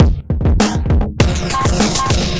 100 Phunkd Drums 04
bit, synth, digital, crushed, dirty, drums